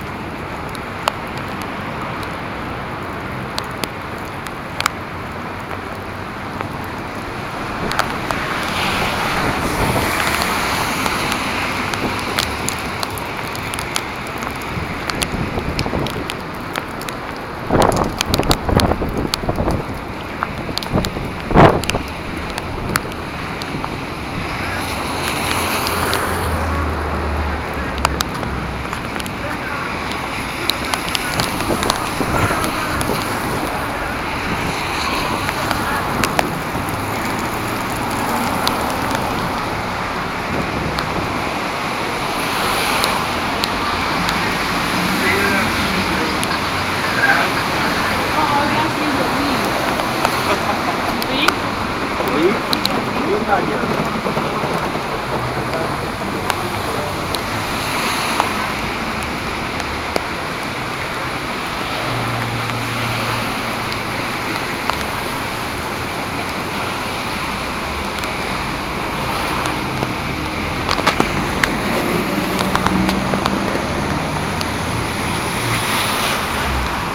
Mono field recording of walking in a busy city during a light rain (no footsteps in recording). Rain, traffic and people talking in English can be heard.
rain, field-recording, traffic